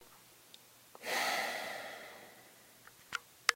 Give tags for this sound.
breathe
breath-heavily
heavy
sigh

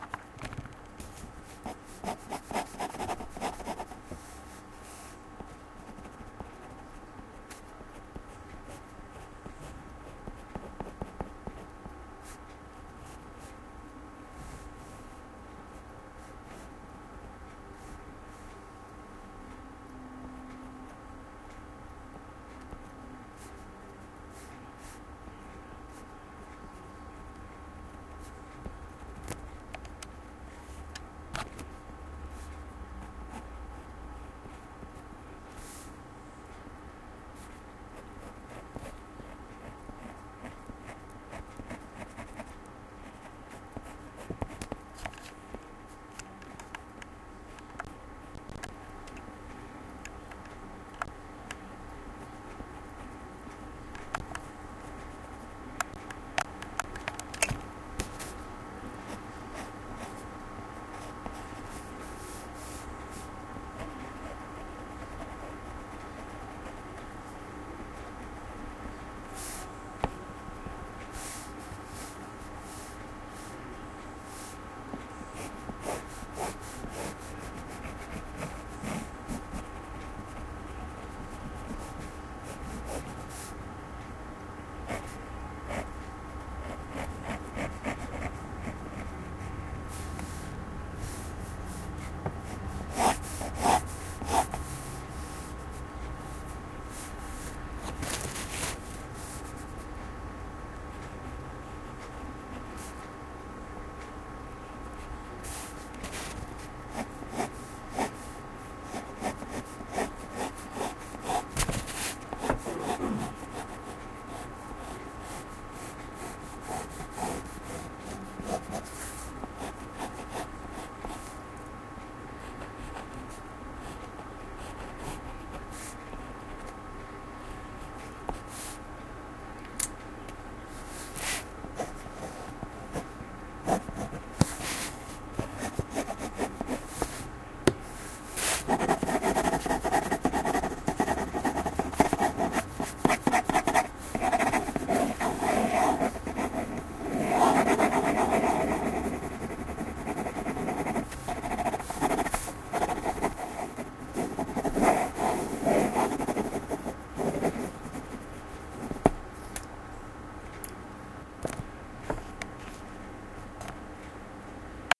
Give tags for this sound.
doodle
Drawing
elsodelescultures
intercultural

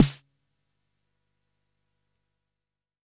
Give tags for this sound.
909
drum
jomox
snare
xbase09

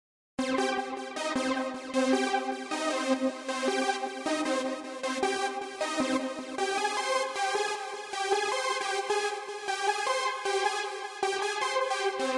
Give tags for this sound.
trance
uplifting

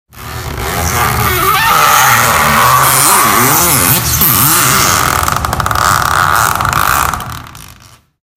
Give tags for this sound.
plastic
hits
squeak
pop
knuckle
thump
hit
hand

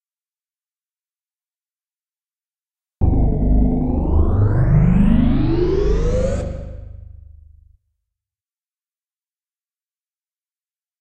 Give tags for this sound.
fx
drone

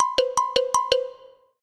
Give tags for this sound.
cartoon
comic
dibujos
xilofono

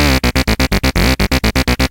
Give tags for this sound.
synth
loop

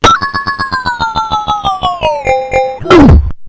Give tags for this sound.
core
experimental
rythmic-distortion

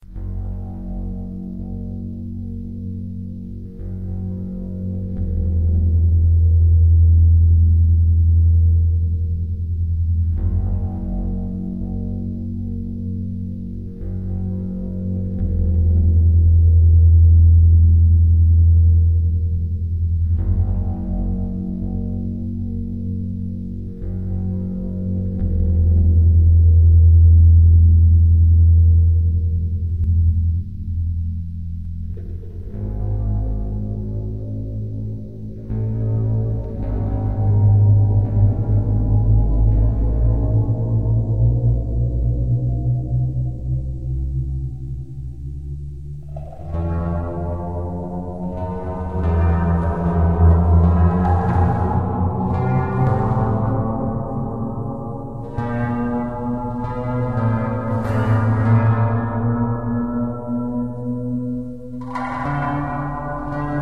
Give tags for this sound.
loop
music
atmosphere
ambient
synth
electro
electronic

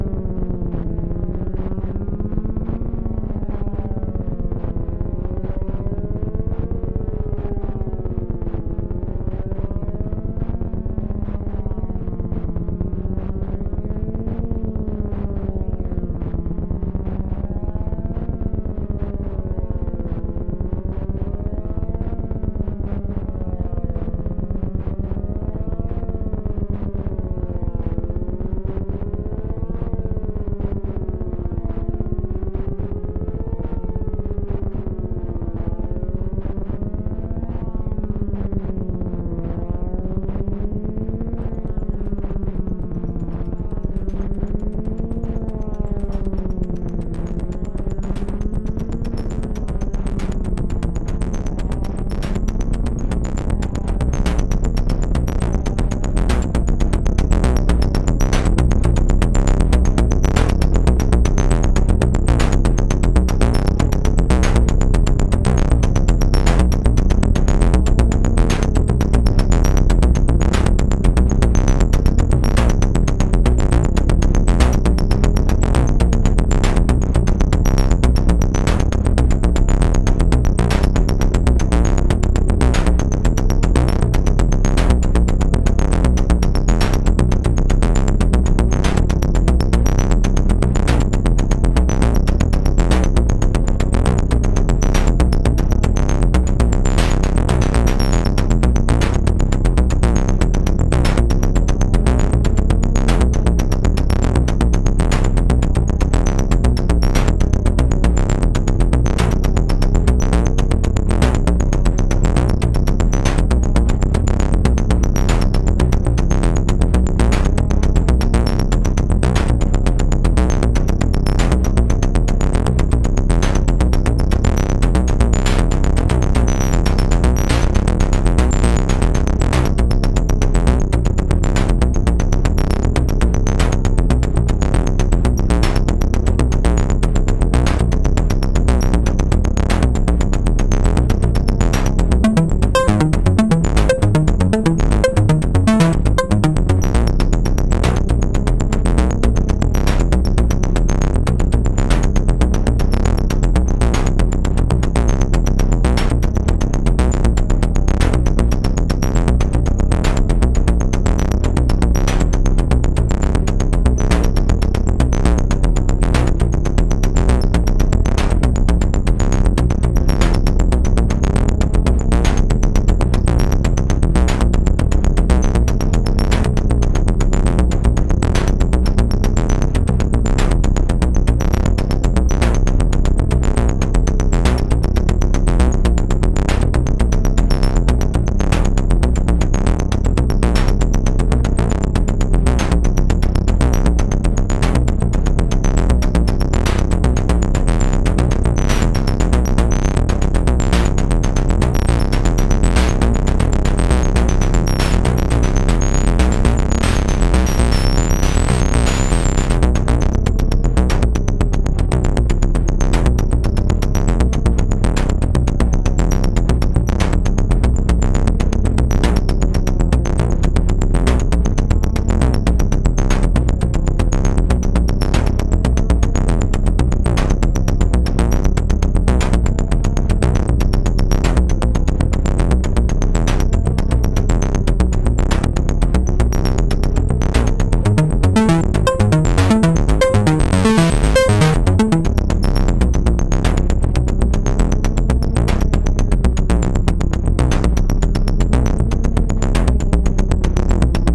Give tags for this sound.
Tetra
Analog
Synth

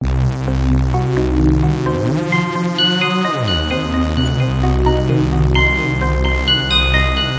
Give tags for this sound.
game
sound
music
loop

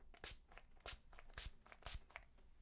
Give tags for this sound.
perfume
spraying

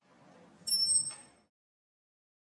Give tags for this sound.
Foley
Door
Metal

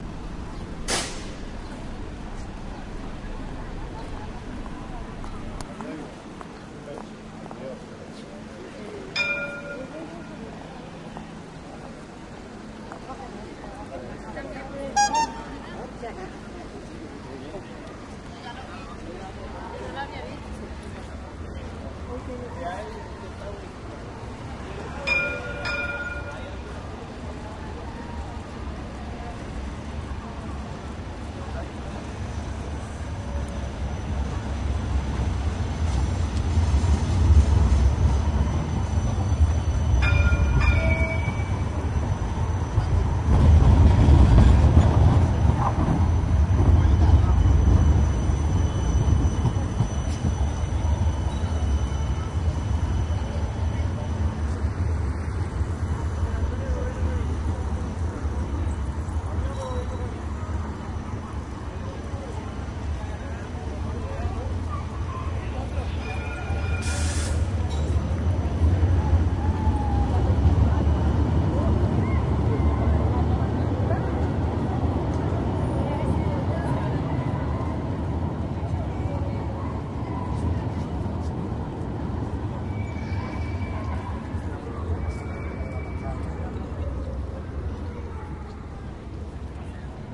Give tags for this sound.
tramway
city
tramcar
field-recording
rumbling
machine
streetnoise

